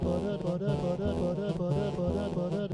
sax realtime edited with max/msp
baduhbaduh, clerk, dragging, edited, like-hs2-with-voice, loop, sax